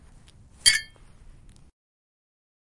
To submit the quotidian to protocols of experimentation is to denaturalize, in practice, the so-called 'necessity' of the way everyday life is organized. It is a process of re-imagining, through electronic modulation, our sense of what is possible in the public sphere. Other soundscapes are possible and fully realizable.
Jackt Canteen was recorded with a Tascam dr100 held extremely close to a canteen rubbing against a jacket button.